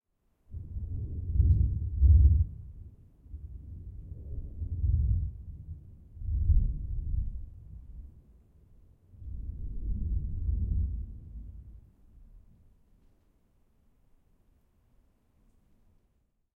Distant Thunder isolated
A roll of thunder from a recent storm in Melbourne, isolated from the rain using Izotope RX6. Recorded with a Roland R-26, omni mics.
lightning, thunder